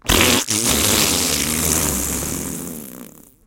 Everybody has to try their hand at making fart noises. Recorded using a Blue Yeti Microphone through Audacity. No-post processing. As can probably be guessed, I made it using my mouth. Starts out strong but fades away quickly.